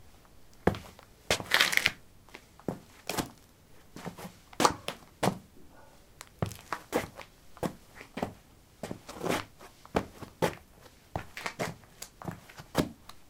Shuffling on concrete: sport shoes. Recorded with a ZOOM H2 in a basement of a house, normalized with Audacity.